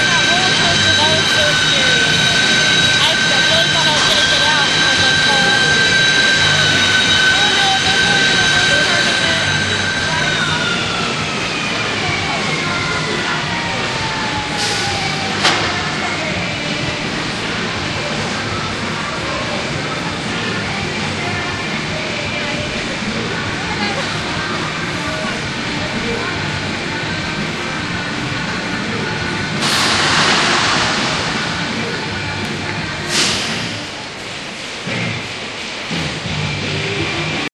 Short segment of a ride on the Music Express on Morey's Pier in Wildwood, NJ recorded with DS-40 and edited in Wavosaur.